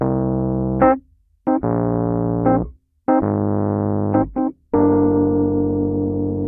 rhodes loop 3
Rhodes loop @ ~74BPM recorded direct into Focusrite interface.
electroacoustic, 74bpm, electric-piano, keyboard, rhodes, vintage, loop